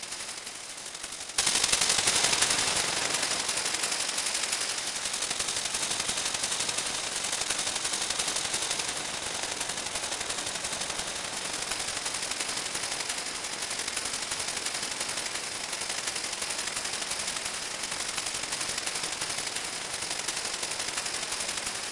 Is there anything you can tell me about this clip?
shaker sounds distorted and looped